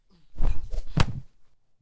A layered sound I made for a flying kick, it could be sped up to be a normal kick too.